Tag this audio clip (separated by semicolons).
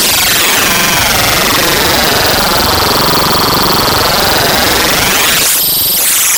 element; digital; CMOS; modular; synth; production; spacecraft; Noisemaker